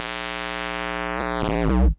A distorted kick-drum/bass sound created from mic-noise. Filtered out high-end noise. Slight reverb added. Distortion.
kick-drum, drum, mic-noise, bass-drum, effect, bass, kick